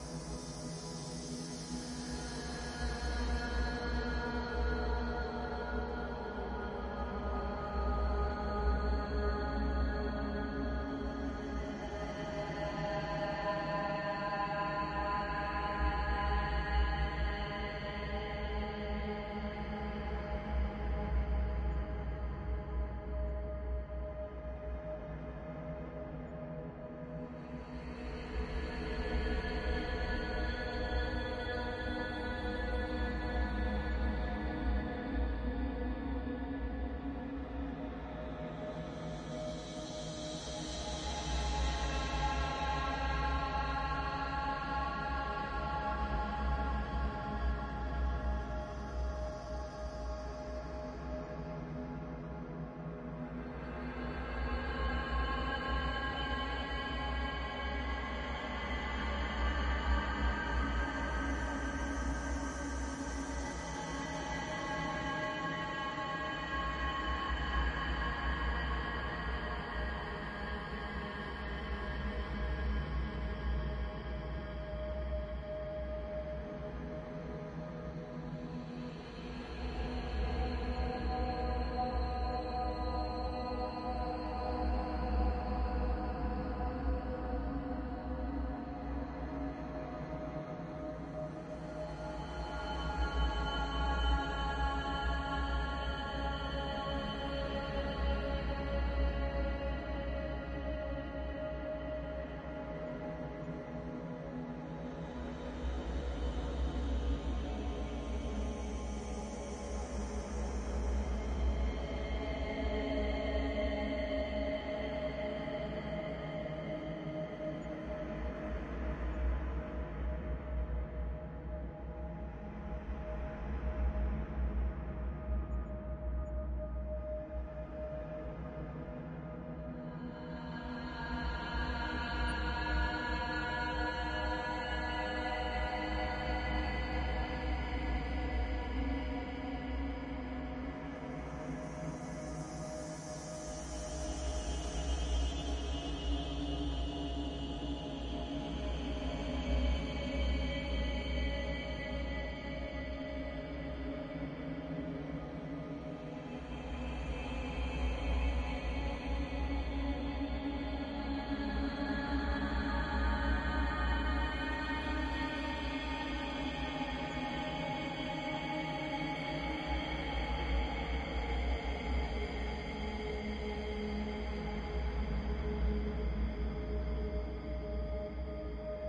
Creepy vocal ambience sound I made in Audacity using the Paul Stretch effect. Thought it sounded kinda cool. Enjoy and make magic as you wish!
ambience, ambient, atmosphere, background, background-sound, creepy, drama, dramatic, gothic, haunted, scary, sinister, soundscape, spooky, suspense, terrifying, terror